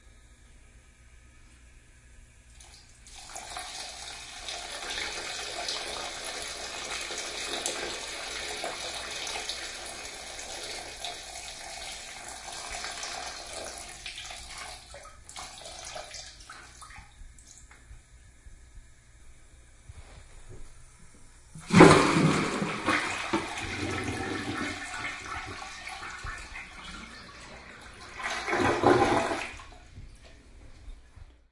Binaural Toilet
Binaural stereo recording of male subject urinating into and flushing a toilet. Recorded with a Sennheiser MKE2002 and intended for headphone listening.
male, flush, toilet, urination, loo, pee, water, bathroom